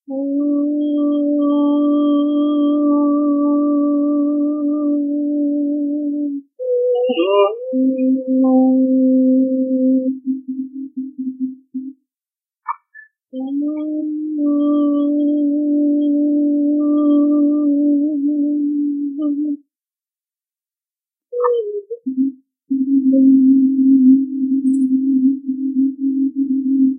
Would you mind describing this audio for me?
fakeglitched voice
very high male voice singing with glitch-style feedback and overlay sounds